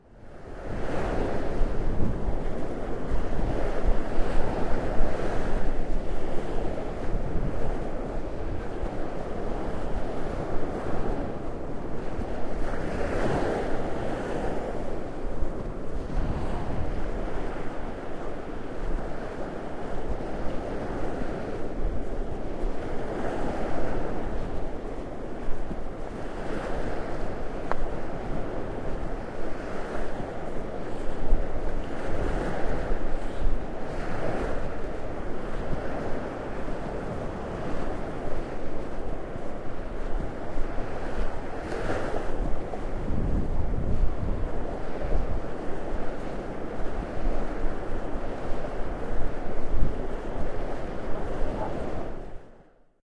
The North Sea 4
Walking along the shore line of the North Sea near Callantsoog (nl). An Edirol R-09 in the breast pocket of my jacket recording the sound of the breakers reaching the beach.
sea, nature, water, field-recording